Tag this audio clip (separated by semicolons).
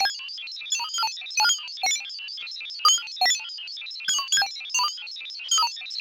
bleep; chaos; computer; dream; electronic; for-animation; game; loop; matrix; synthetic; virtual-reality; virus